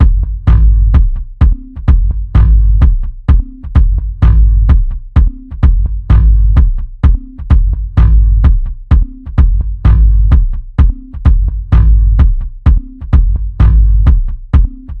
Experimental Kick Loops (17)
A collection of low end bass kick loops perfect for techno,experimental and rhythmic electronic music. Loop audio files.
sound, bass, beat, 4, rhythm, end, design, rhythmic, dance, 2BARS, drum-loop